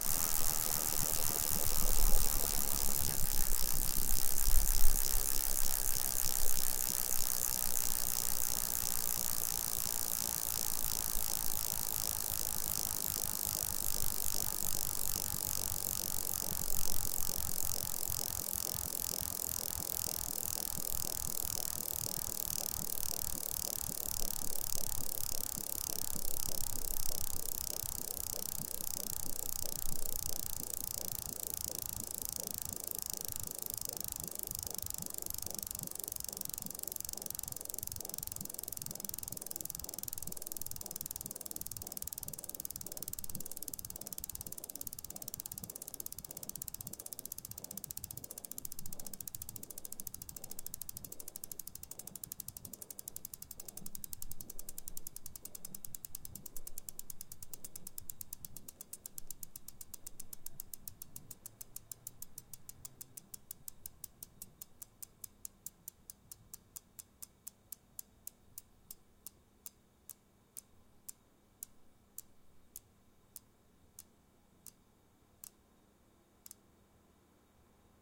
bike freewheel
Mostly a test of some new equipment, this is the sound of a bicycle freewheel spinning, then slowing then stopping.
Recorded with AT4021 mics into a modded Marantz PMD-661.
You can see the setup here:
wheel, flickr, bike, click